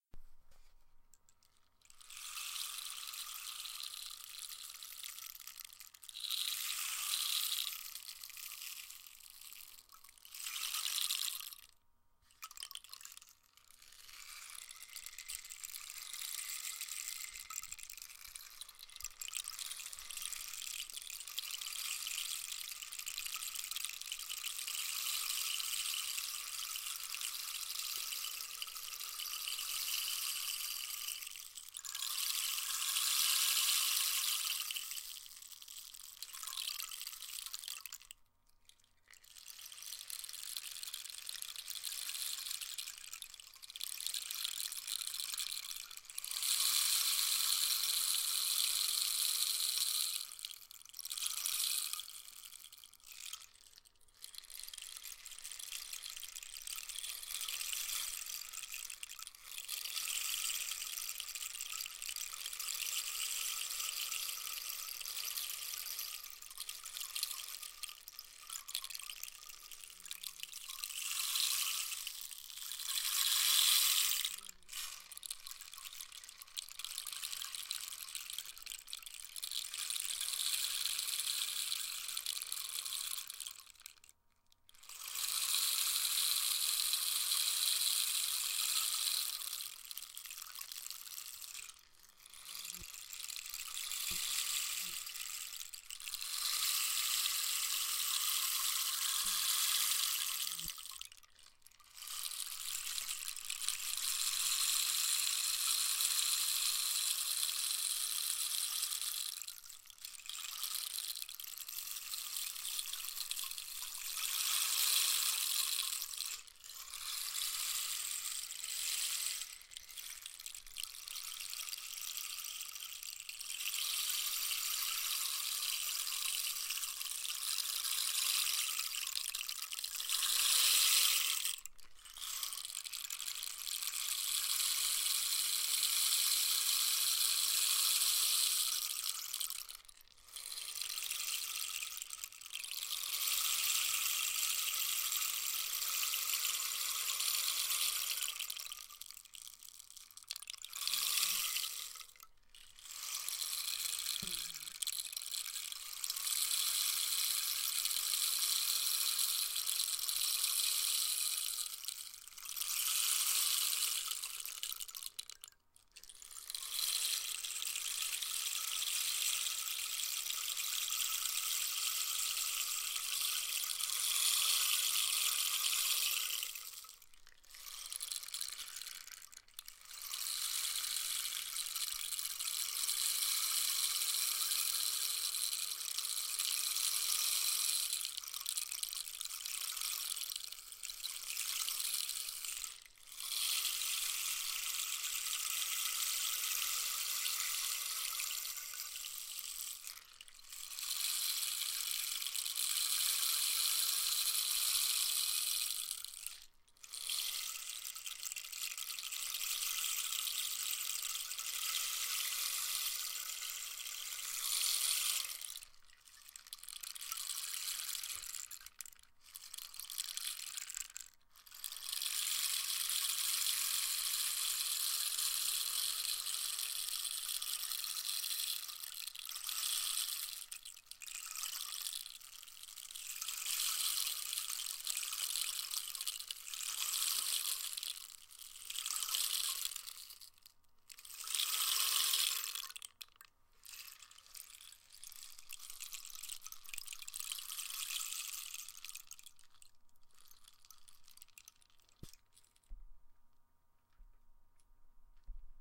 rain stick
soft and slow rain
I used this sound in the production of the album NEXT.
percussion, rain, rainstick, slow, soft, weather